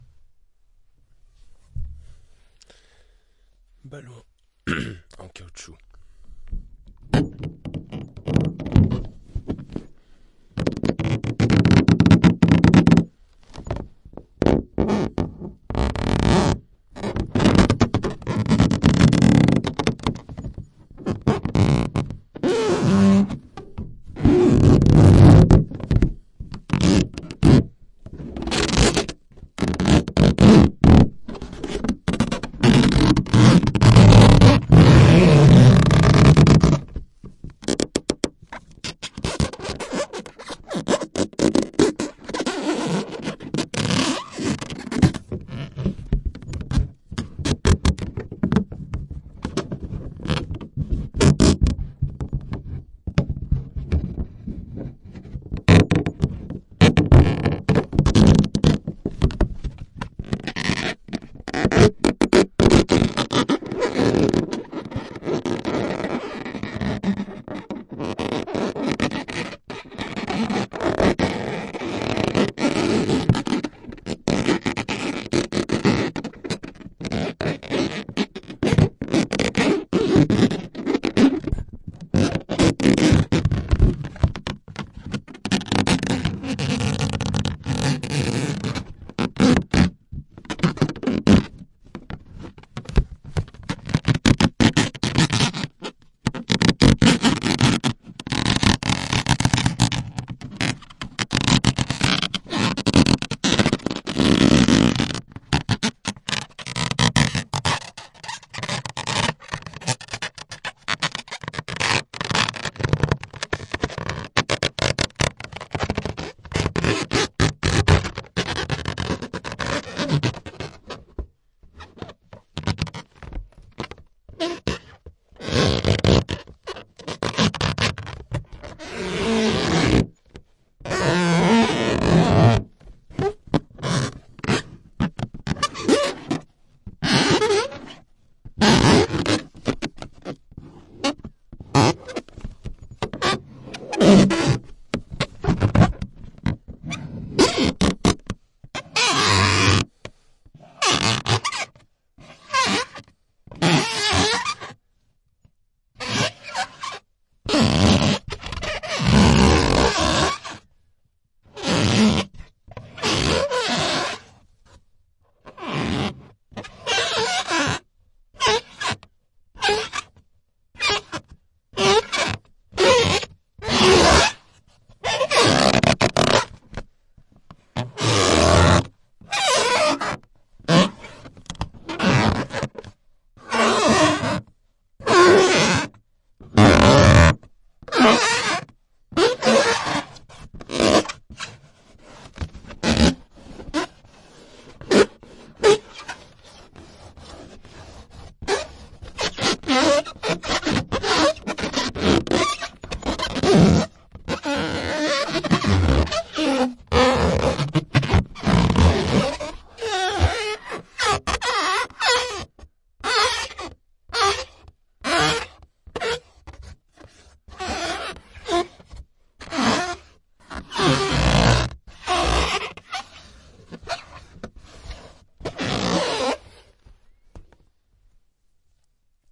Really unpleasant noises in this pack.
They were made for a study about sounds that creates a shiver.
Not a "psychological" but a physical one.
Interior - Stereo recording.
Tascam DAT DA-P1 recorder + AKG SE300B microphones - CK91 capsules (cardioid)